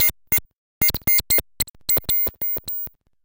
simple but lovely bleepstaken from vectrave an experimental virtual synthesizer by JackDarkjust compressed and equalized[part of a pack called iLLCommunications]
fx, bleep, tlc, computer